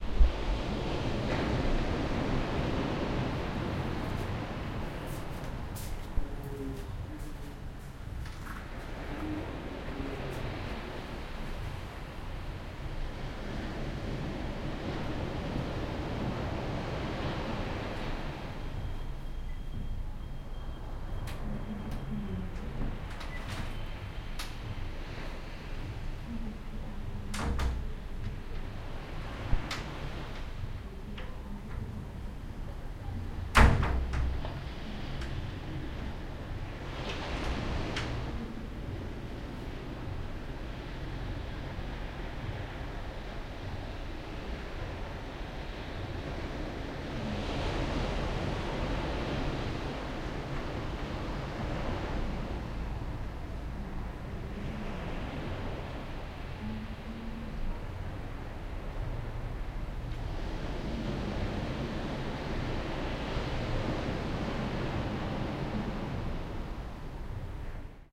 Hotel do Mar 2012-5
Hotel do Mar,Sesimbra, Portugal 19-Aug-2012 23:10, recorded with a Zoom H1, internal mic with standard windscreen.
Ambiance recording.
Everybody seems to have gone to bed now. There is no noise comming from the street or the hotel bar. You can hear me walking away from the recorder in the my room balcony, gettting into the room and closing the door. My wife is in the room watching TV (you can briefly hear the TV when I open the balcony door to go to the room).
ambiance, August, balcony, closing-door, footsteps, hotel, night, Portugal, quiet, sea, Sesimbra, Summer, waves